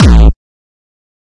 Distorted kick created with F.L. Studio. Blood Overdrive, Parametric EQ, Stereo enhancer, and EQUO effects were used.
bass, beat, distorted, distortion, drum, drumloop, hard, hardcore, kick, kickdrum, melody, progression, synth, techno, trance